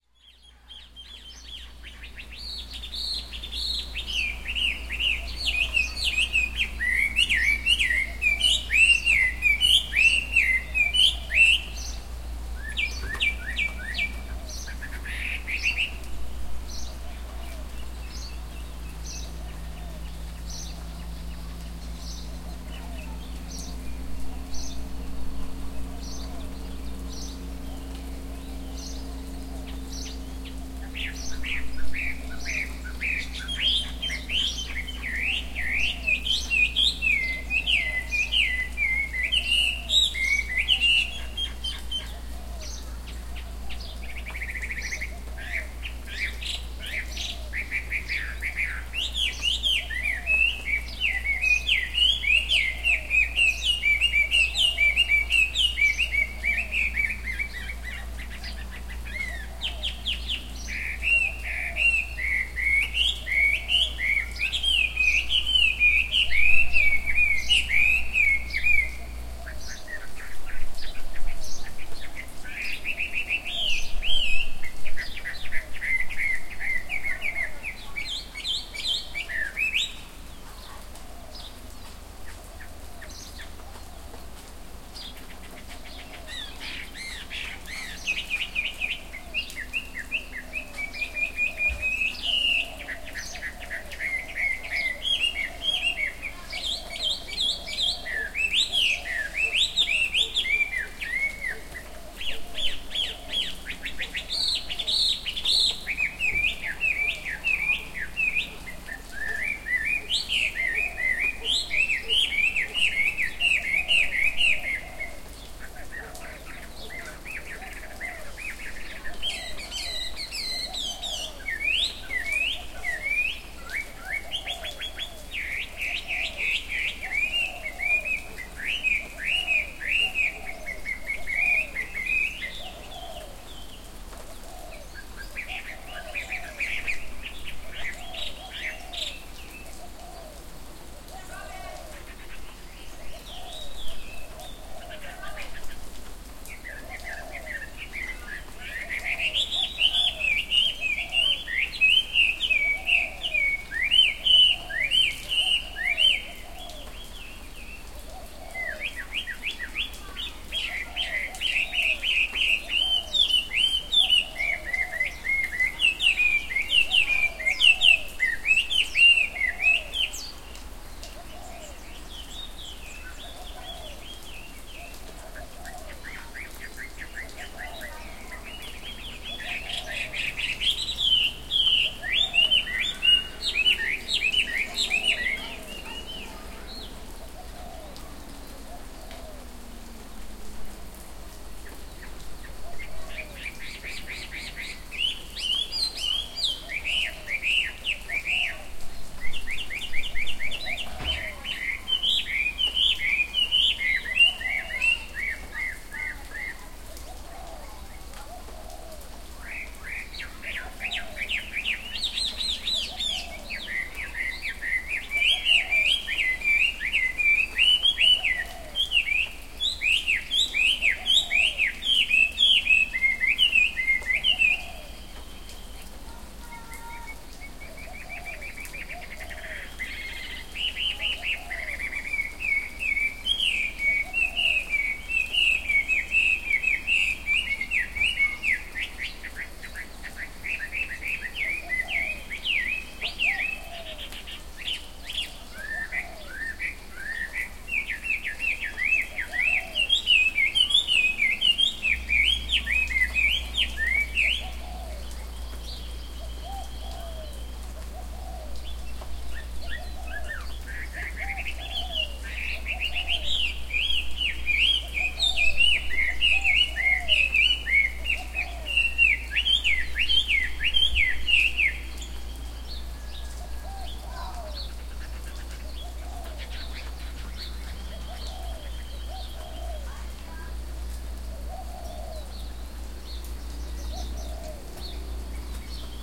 Hawaii birds and soft rain field recording
Some recordings of a particularly annoying bird in the early morning. I'm still working to identify the bird specifically and will update when I do.
birdsong, rainforest, soft-rain, hawaii, bird, field-recording, birds, nature, forest